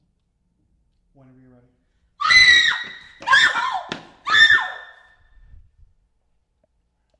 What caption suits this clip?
girl scream frank 3
girl, horror, scary, scream, screaming, screams, woman